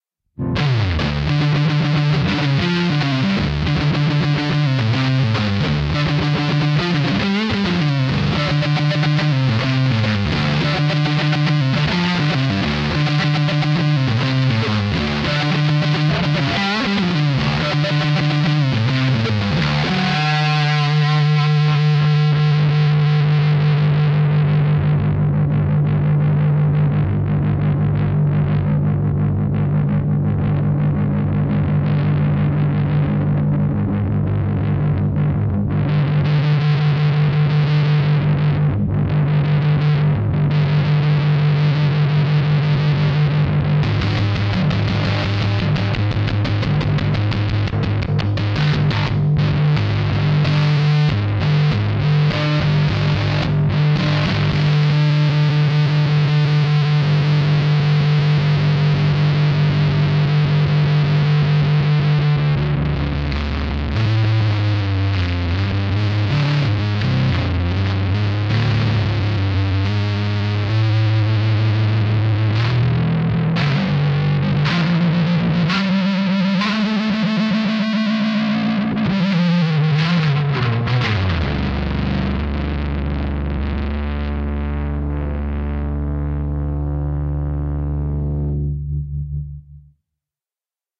crazy fuzzy D
sorta fuzzy and spacey, kind of fast at first but with tons of noise at the end
lick, guitar, Fuzz, noise, fast, space, electric, distortion, overdrive